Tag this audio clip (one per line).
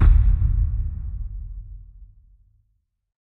explosion
firearm
boom
explode
military
army
battle
bang
detonation
war
grenade
dynamite
bomb
explosive